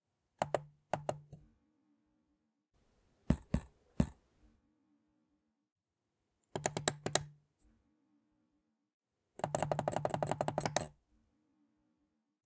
Touchpad, clicking
Hitting the touchpad's clicking bar.